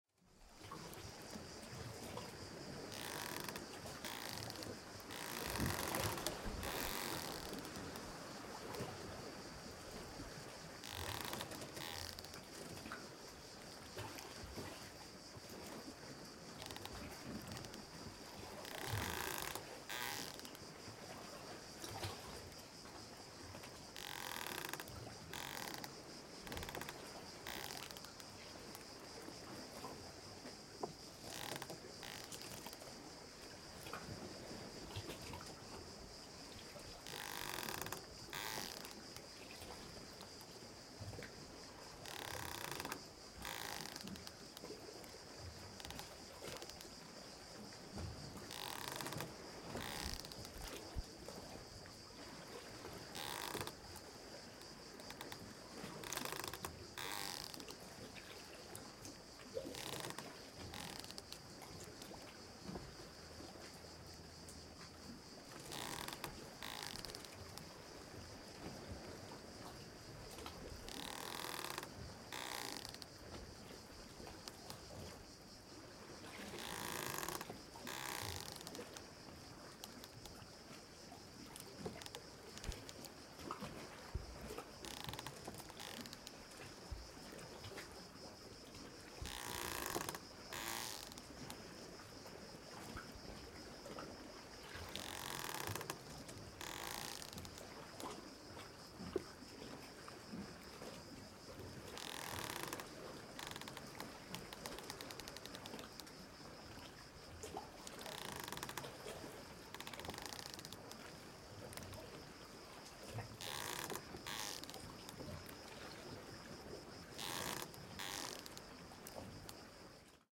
Ambience Sea Boat Night Ropes 1
rope squeaks on boat
Ambience, Boat, Night, Ropes, Sea